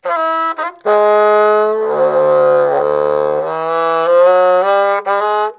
Free bassoon lick based on G